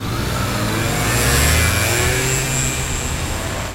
This is a sound of a motorbike accelerating in a street.